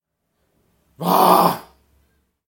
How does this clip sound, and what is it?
foley for my final assignment, just me roaring, you can edit it to make it into a dragon's roar